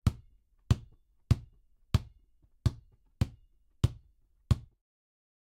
02 Basketball - Dribbling Slow
Dribbling a basketball slowly.
Basketball, CZ, Czech, Pansk, Panska, Slow, Sport